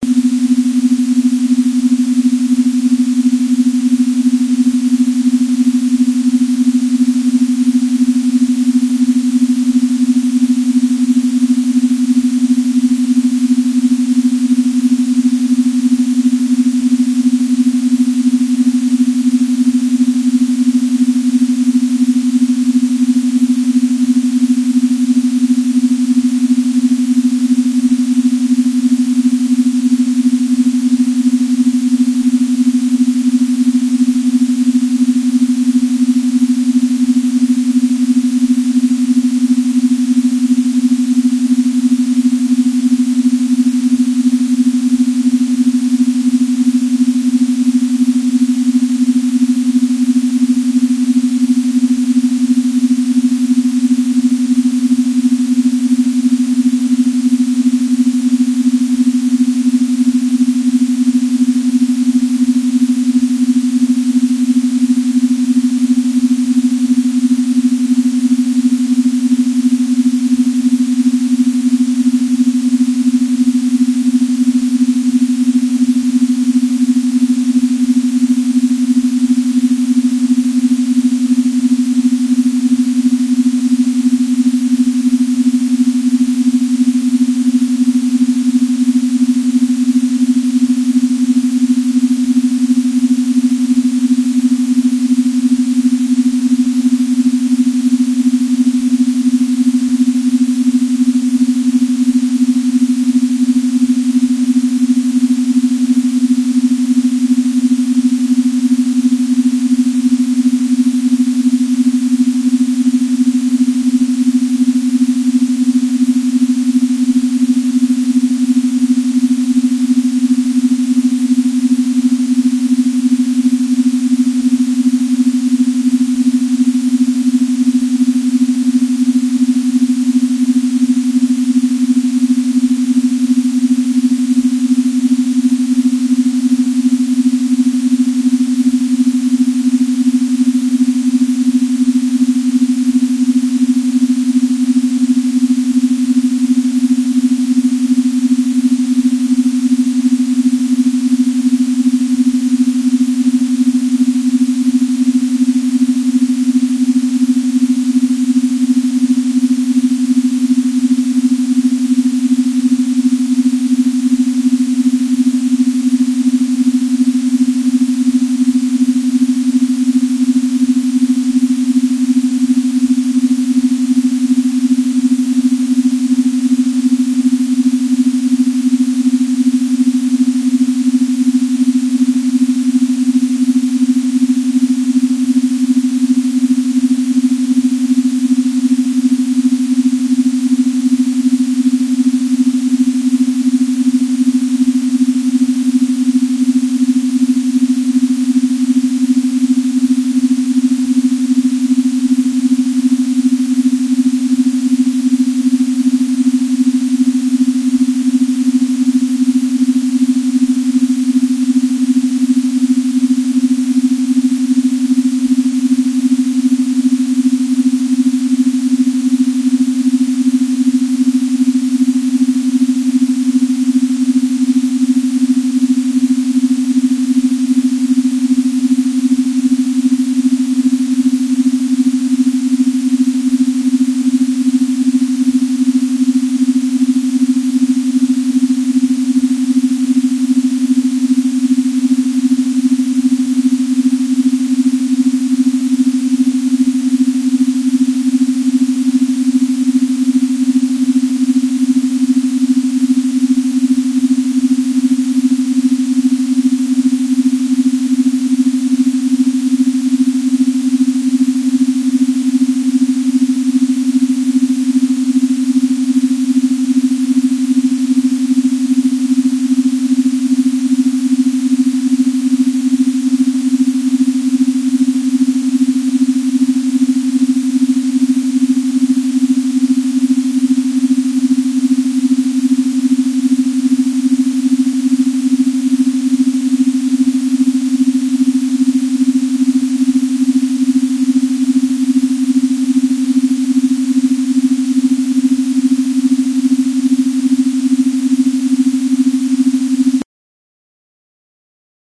Track one of a custom session created with shareware and cool edit 96. These binaural beat encoded tracks gradually take you from a relaxing modes into creative thought and other targeted cycles. Binaural beats are the slight differences in frequencies that simulate the frequencies outside of our hearing range creating synchronization of the two hemispheres of the human brain. Should be listened to on headphones or it won't work.
alpha, beat, brain